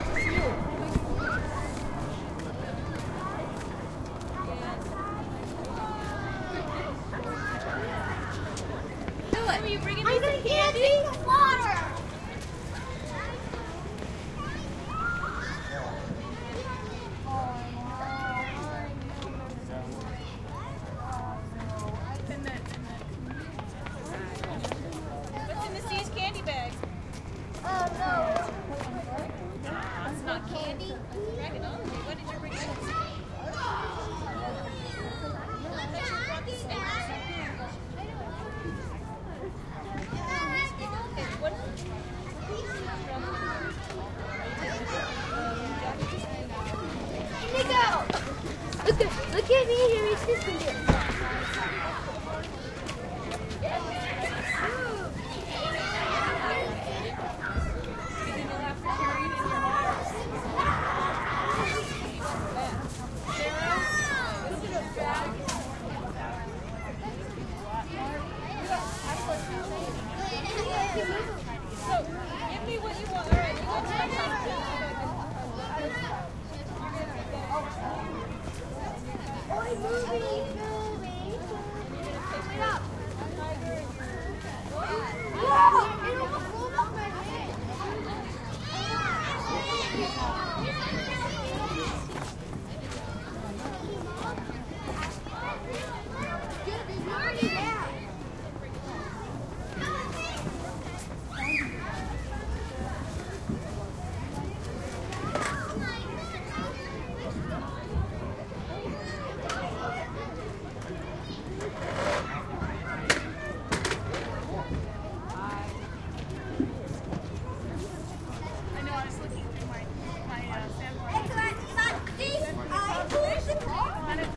kids playing and parents chatting in the playground after elementary school
kids, conversation